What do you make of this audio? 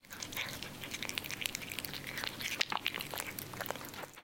12-3 cat eating3
Cat is eating. WOOOOOOW (its there more than 3 times, woooow)
cat, cats, eat, eating, licking, sound